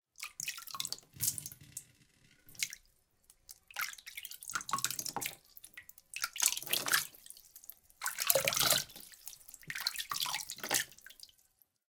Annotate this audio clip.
water scoop drip with hand bathroom acoustic
hand, scoop, water, drip, bathroom